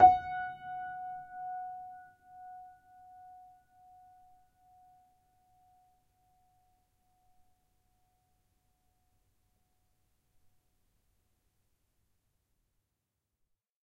upright choiseul piano multisample recorded using zoom H4n
upright, piano